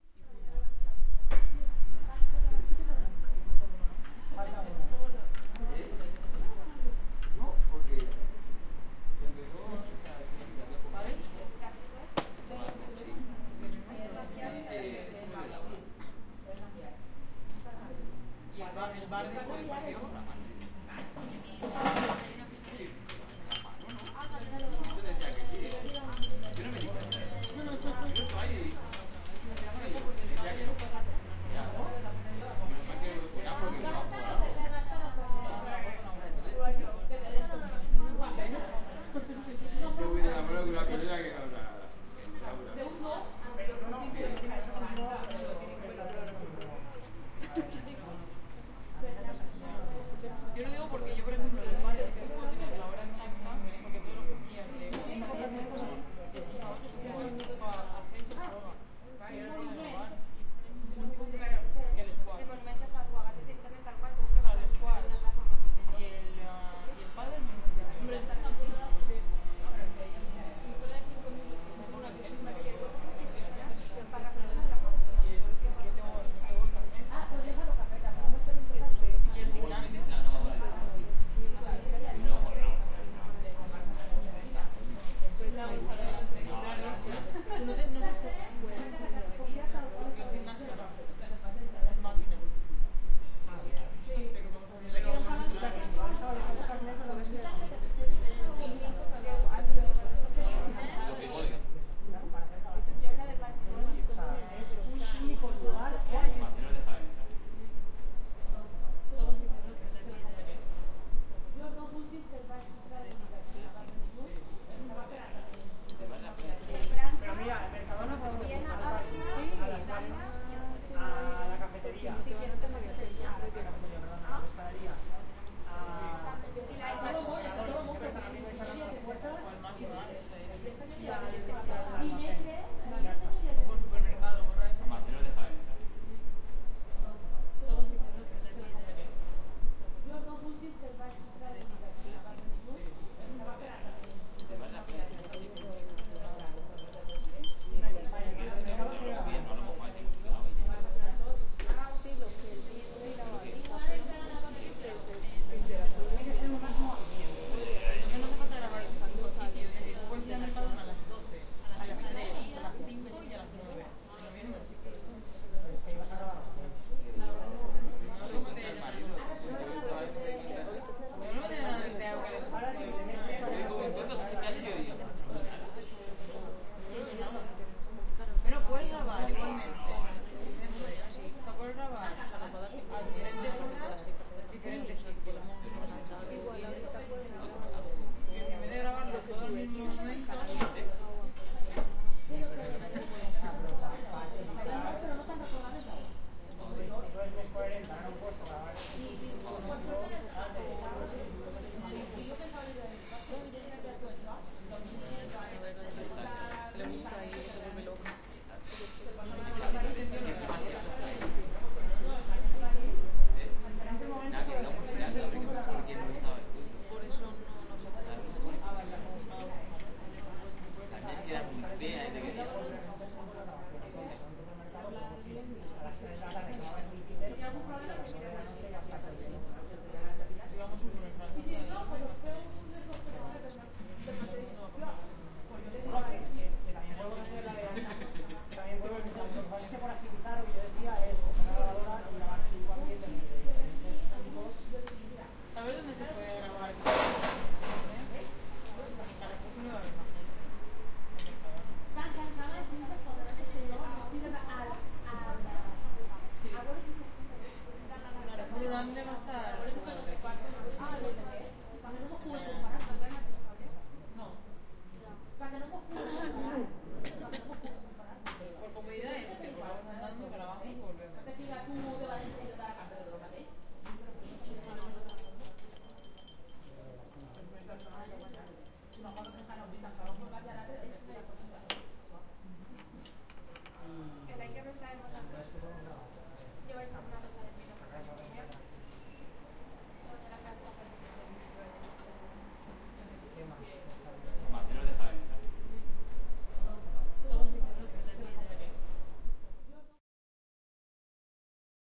Calm cafeteria placed in the exterior in the university. Tipical sounds of a caferteria like cups, tables or chairs can be apreciated, also some dialogs in Castillia.
Recorded with headword binaural microphones Soundman OKM